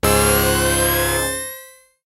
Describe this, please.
OB-8 synthesizer chord with pitch bend.